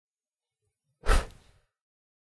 Dousing the match.
match, doused, light, matches